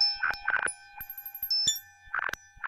Bell Blip Loop II
Bells and blips all in one!